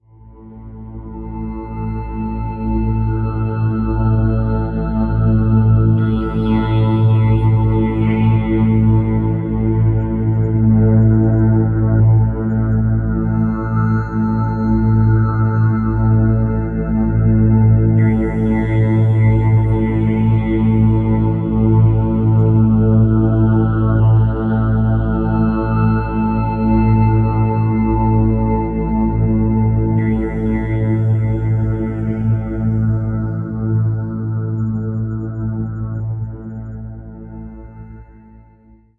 deep -sounding pad , with vibarionen, 120BPM
I create it with the Atmos-Synth (Magix musik maker 2016) + 2 Effects (efx_Phaser and Vocoder)
I have it , used in this song